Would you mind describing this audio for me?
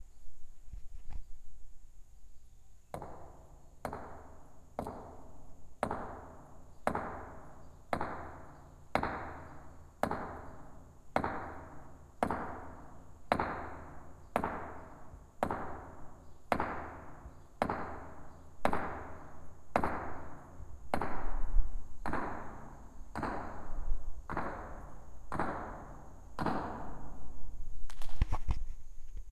hitting a giant stone against the wall of a stone silo. nice natural reverb inside there
hitting, stones, rock, natural, reverb, stone, rocks